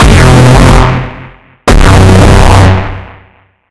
distorted double shot bass- WARNING! this sound is very loud! -I needed aggressive sounds, so I have experienced various types of distortion on sounds like basses, fx and drones. Just distorsions and screaming feedbacks, filter and reverbs in some cases.